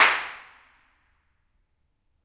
Clap at Two Church 3
Clapping in echoey spots to map the reverb. This means you can use it make your own convolution reverbs
reverb, impulse-response, reflections, room, convolution-reverb, bang, clap, spaces